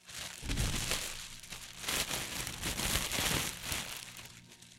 ruffling plastic
Do you have a request?